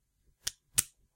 Pen click
click, pen, sound